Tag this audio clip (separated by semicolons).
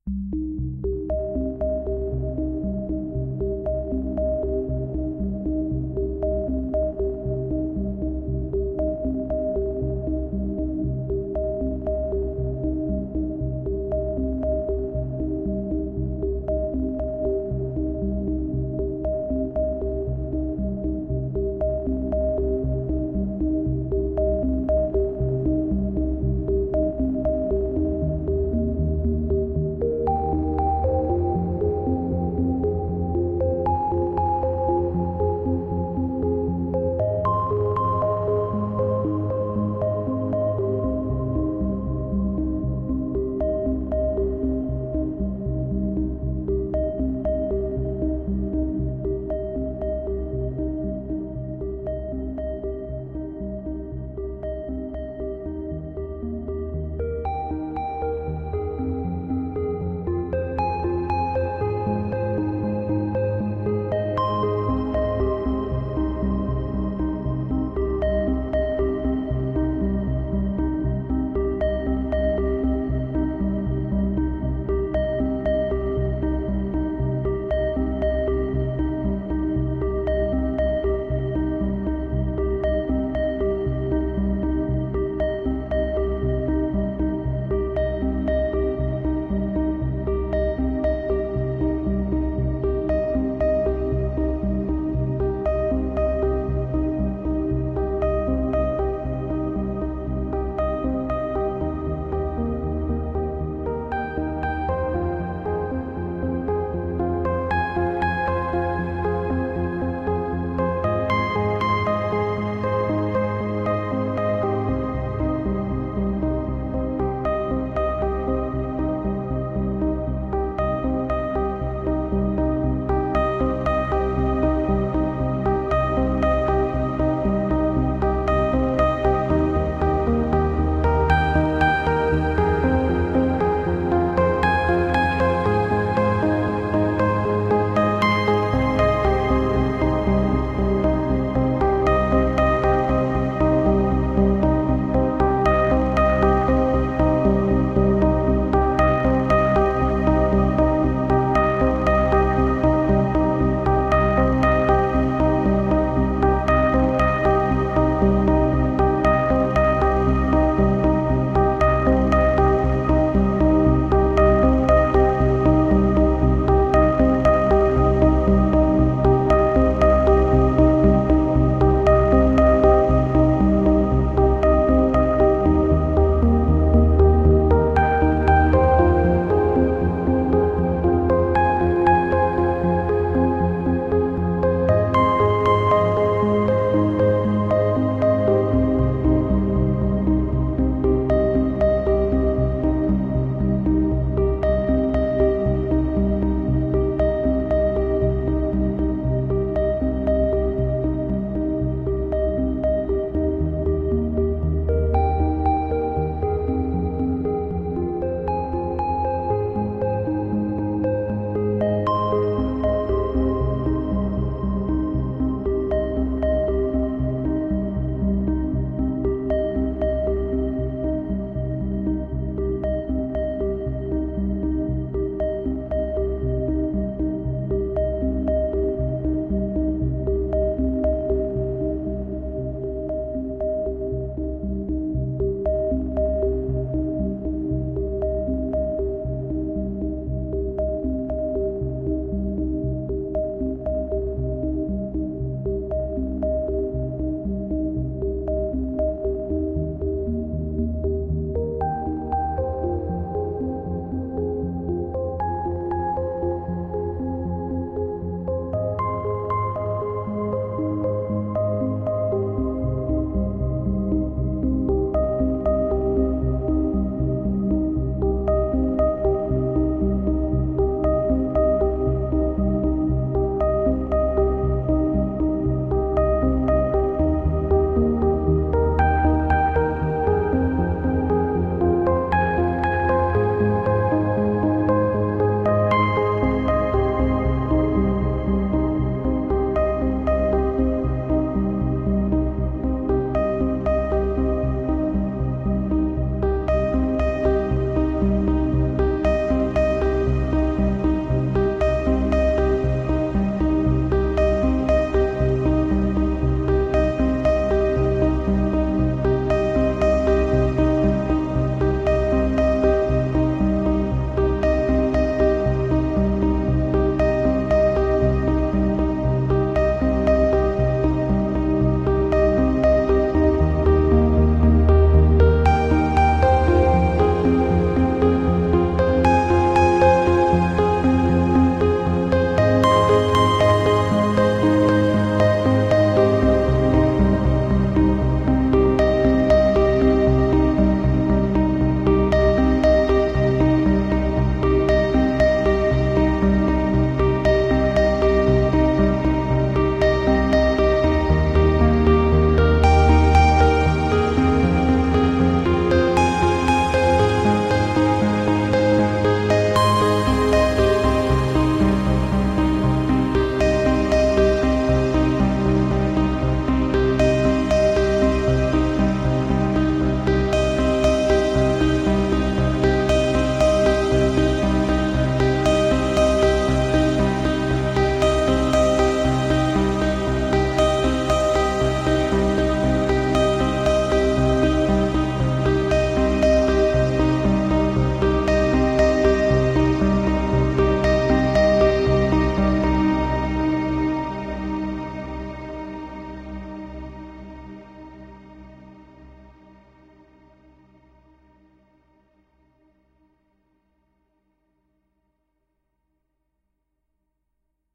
ambiance; ambient; soundscape